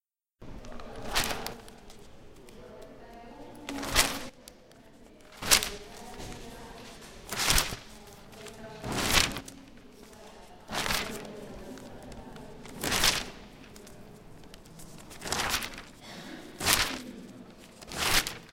Sound produced when we read and browse a newspaper. This sound was recorded in the library of UPF.